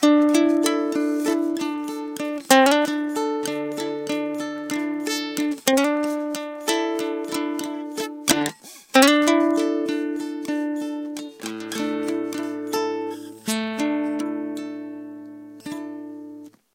short guitar riff. Ibanez guitar into FEL Microphone Amplifier BMA2, PCM-M10 recorder
thoughtful, coda